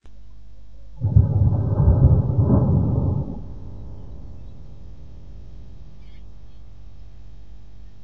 Thunder storm in Wałbrzych I recorded through my window with PC microphone.